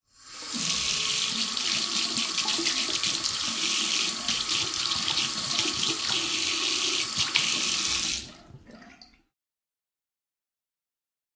12 - Hand washing
Washing of hands.
bath, bathroom, cz, panska, shower, sink, soap, water